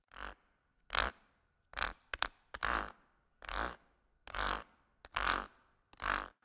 Sound 3- Edited
This sound is a pencil being moved up and down the spine of a spiral notebook. The sound has been slowed down, the tempo was slowed as well as the pitch- changed together by using classic mode in Logic.